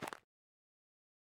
Footsteps Sneakers Underbrush Walk03
A small collection of footsteps:
This time it is sneakers brushing off dirt.
Enjoy and look out for the other footsteps packs :)
recorded/mixed/created by
Patrick-Raul Babinsky
Do not forget to credit :)
underbrush, sneaker, footstep, dirt